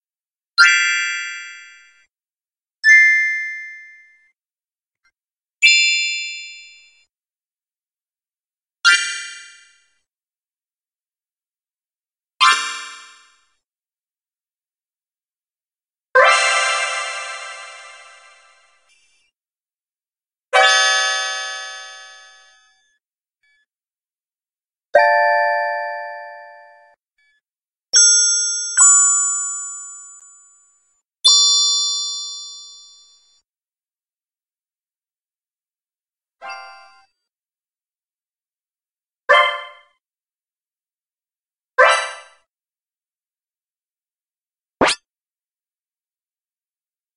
Cute Magic Sounds
Just random sounds I did in 3ML Editor. The program actually glitched (the piano keys plays even though I didn't pressed it) during the time I made this, but I'm glad it wasn't really a big glitch. XD
Made in 3ML Piano Editor.
cartoon, cute, magic, anime, sfx, sound, effect, wand